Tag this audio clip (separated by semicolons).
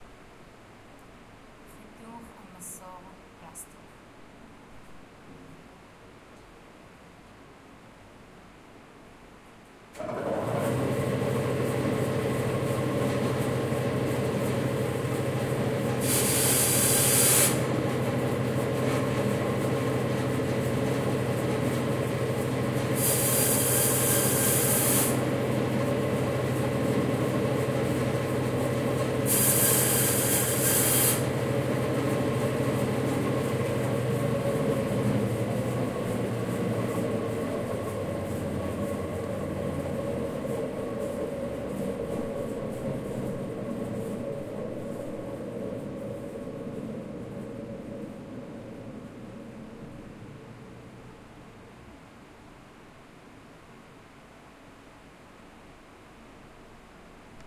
tools; worktools